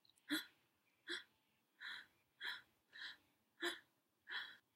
Ah! sound female
Soft "ah" sounds of subtle surprise
ah, soft, voice, woman